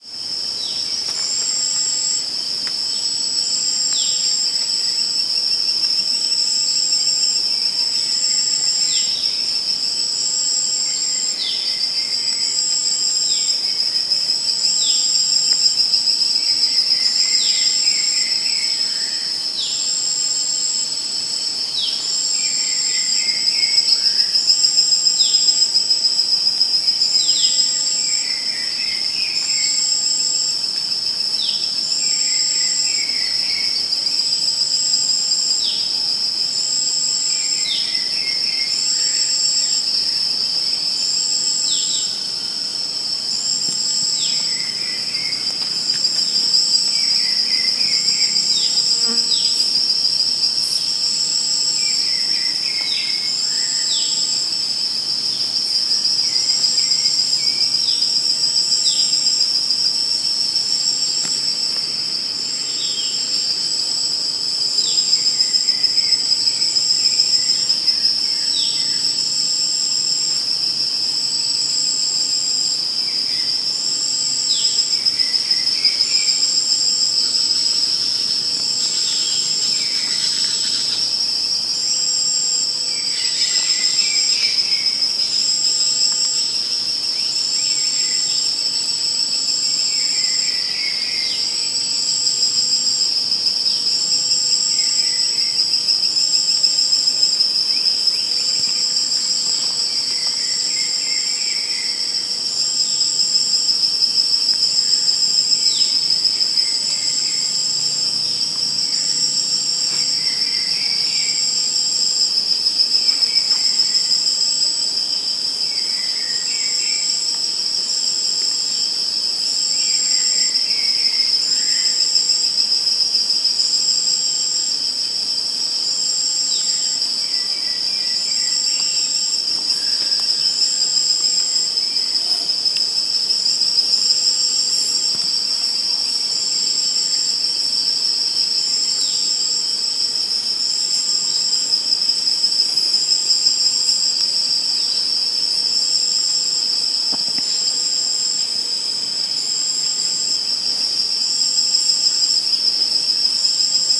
Tropical Forrest Ambient
A field recording of the tropical forrest in Minca, Colombia, recorded on July 2020 with Dictaphone on an iPhone 5.
SF Sounds Fiction
de, Nature, Nevada, Colombia, jungle, tropical, insects, Santa, Sierra, Marta, Minca